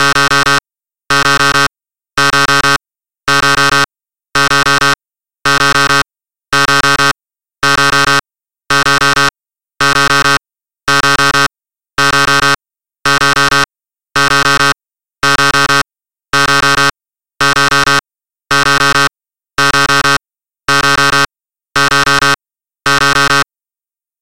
Alarm 09 (loop)
alarm, alert, alerts, beep, beeping, clock, computer, electronics, emergency, error, malfunction, technology, warning